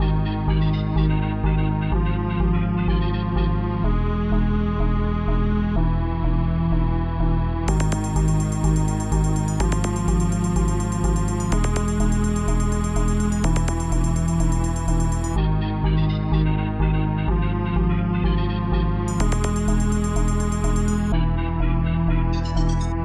SNAKE IN DA GRASS
This is part of the Electro Experimental. Calming I think.
- recorded and developed August 2016. I hope you enjoy.